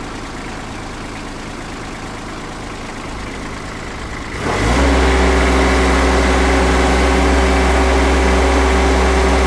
I cannot remember what kind of motor I recorded, as I did this recording in 2013. Its recorded with a H4N somewhere in the city centre of London (UK) and I used it previously for car sounds.
accelerating, race, zoomh4